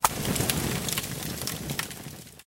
Zapalenie ognia
Fire Start - recorded using Earthworks QTC30 and LiquidPre
fire, up